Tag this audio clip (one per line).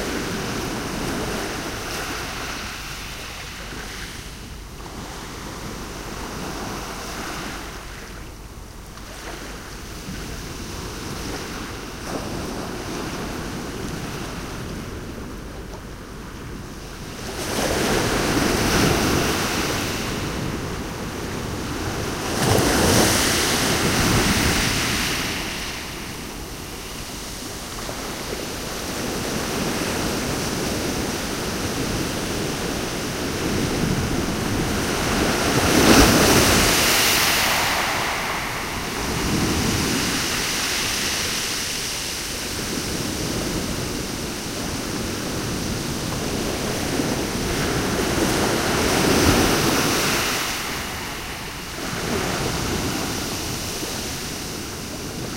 beach
splash
seashore
ocean
stereo
loop
waves
water
field-recording
sea
Point-Reyes